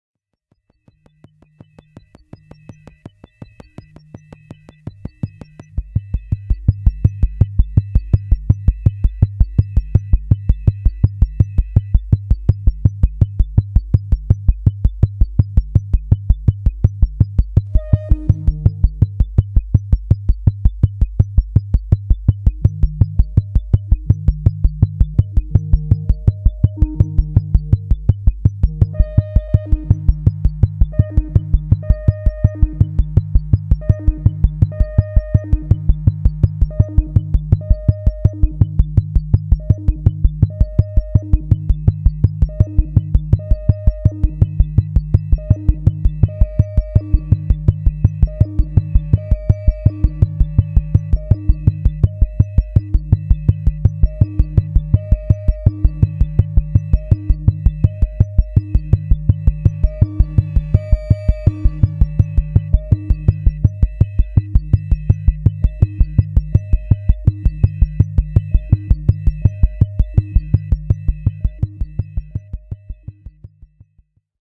Max Msp + Korg ms20